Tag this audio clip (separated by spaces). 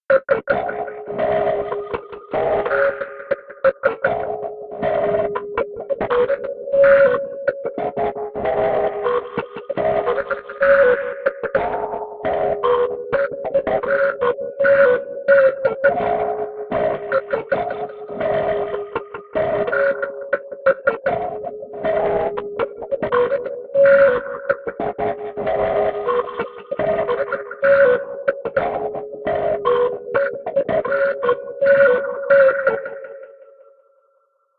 data experiment atlas large collider hadron sonification physics lhc proton